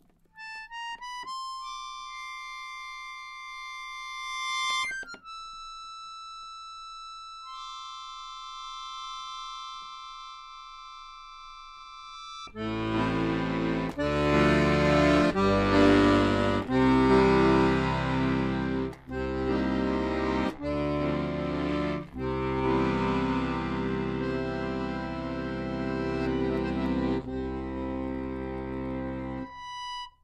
accordion melody 17
accordion studio recording
accordion, melody, recording